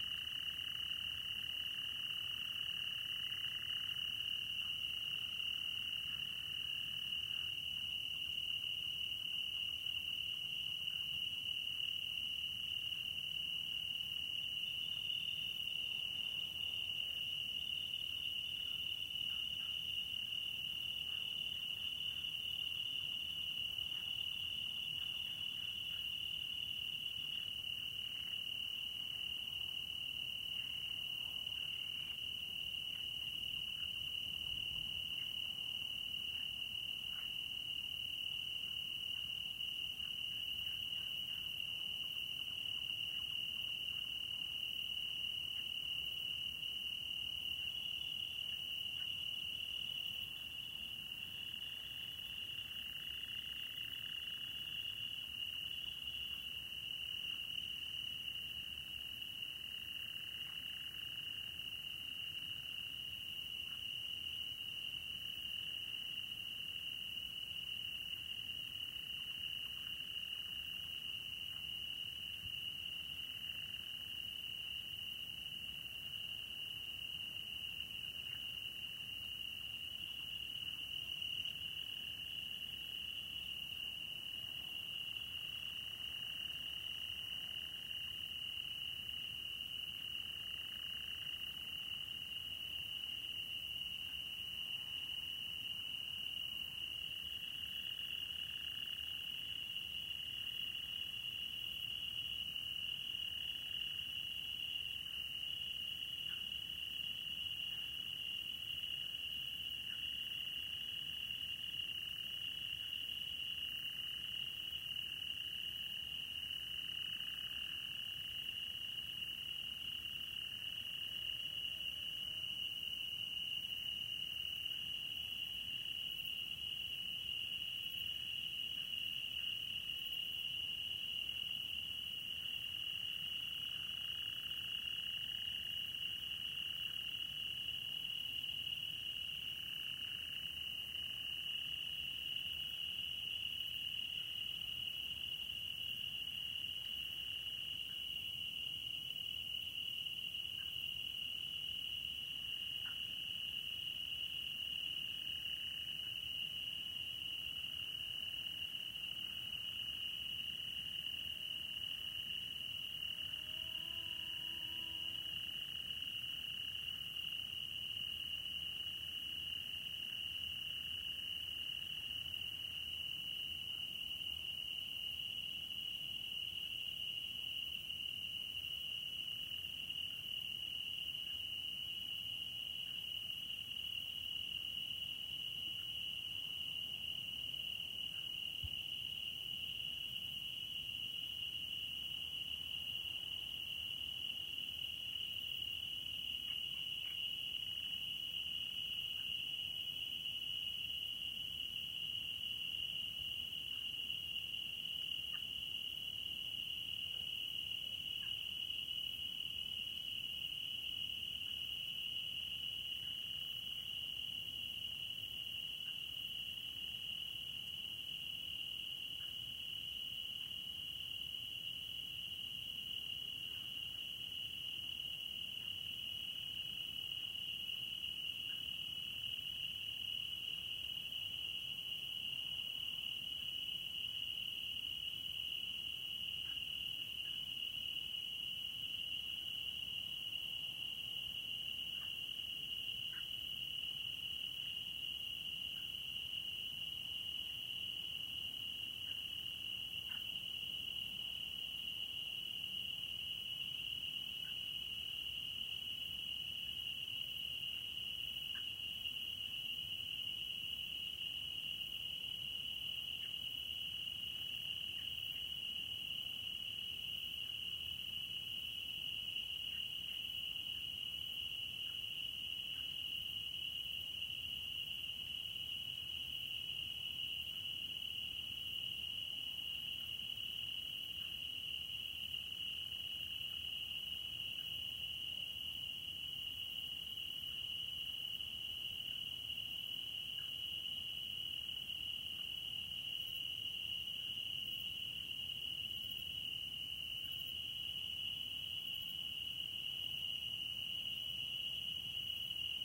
21062007.night.early
Early night ambiance in scrub near Donana National Park, S Spain, including crickets, Nightjar calls, soft frog calls, some distant vehicles and mosquitoes, as well as the rumble of waves on the distant beach. Decoded to mid-side stereo with free VST Voxengo plugin, unedited otherwise.
nature, south-spain, ambiance, field-recording, summer, birds